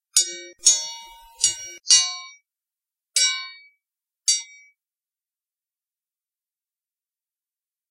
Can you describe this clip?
Shield / sword hits
This is a sound I made using a hammer and crowbar I recorded it on a dm-20SL2 mic.
Captain, clanging, fight, hits, metal, ps2u, Shield, Sword